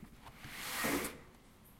A house window opening quickly.